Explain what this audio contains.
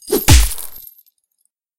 Nunchucks Strike
This is the 1st sound I uploaded online in like four years. I'm shocked no one uploaded a sound like this prior 2020! It was made using layering of crackling sounds, deep drum for base, chain sound effect, and of course a nice swoosh. The end result is a sound that is very crunchy and powerful.
Share your work with me!
attack, combat, fight, melee, nunchaku, nunchucks